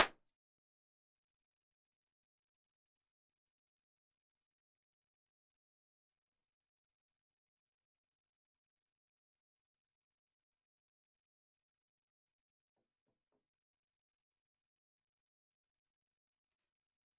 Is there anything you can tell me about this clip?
Spinnerij TDG Studio controlroom
Audio studio control room IR. Recorded with Neumann km84s.
convolution, impulse-response, IR